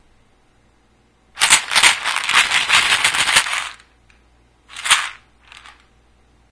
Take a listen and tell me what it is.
this is me shaking an aspirin bottle.
bottle, pill, shake